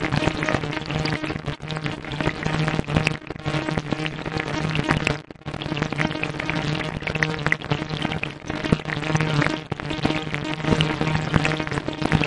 Sizzling granular synth noise. We used non saturated cooking oil for those on lower cholesterol diets.